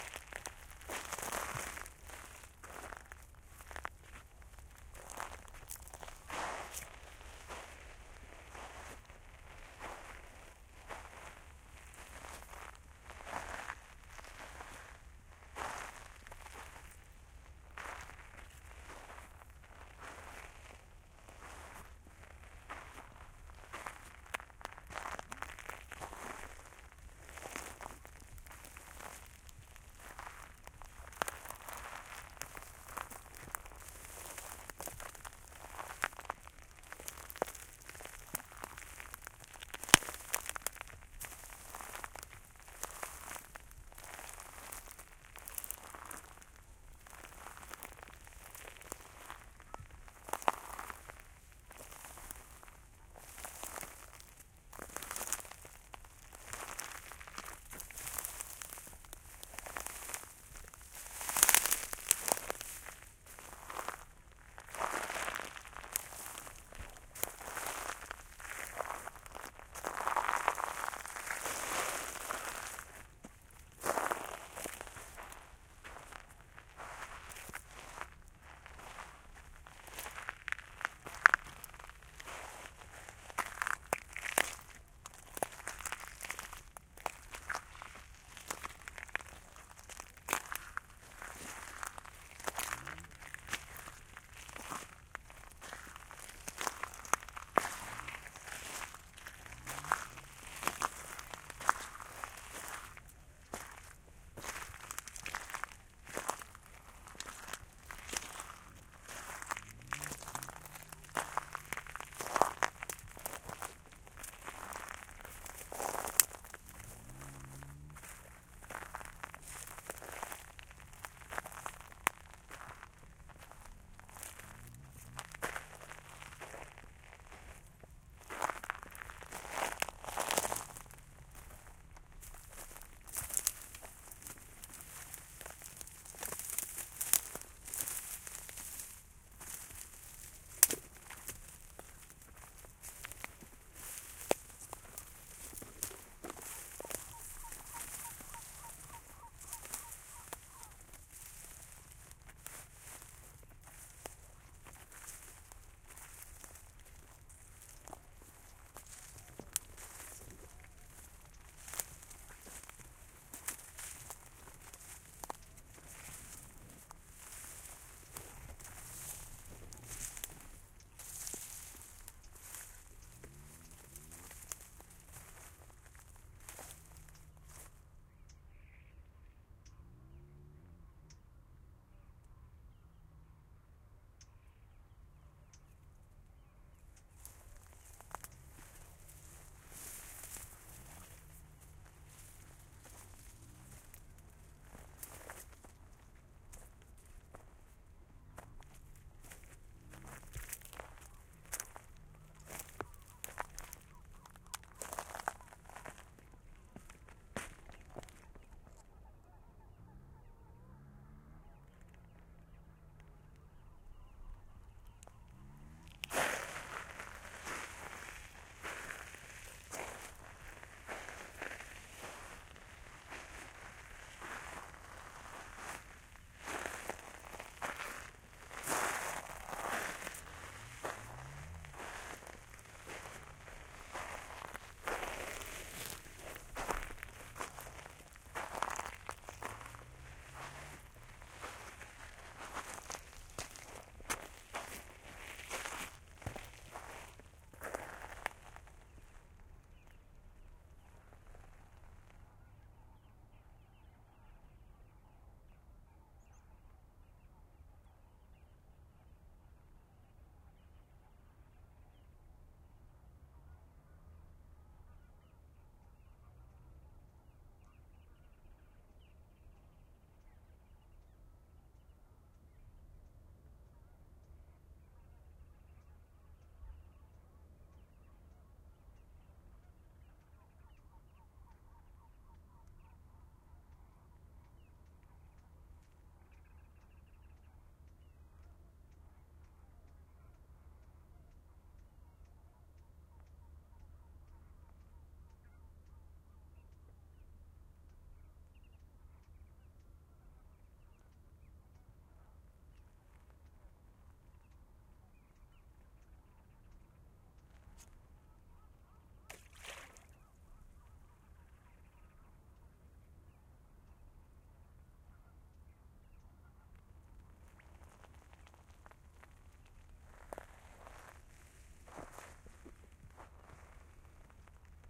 wet land walk
walking across a wetland in south of france, villeneuve les maguelones.
sand and many different kind of sand and vegetation craking
feet, field-recording, foot, footstep, footsteps, ground, land, step, steps, walk, walking, wet